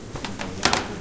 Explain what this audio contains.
wood cracking. Olympus LS10, internal mics
field-recording, wood, cracking